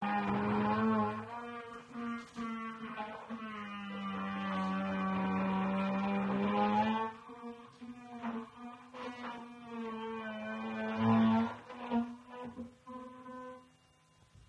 Recorded during inhouse construction work with H2N, no editing.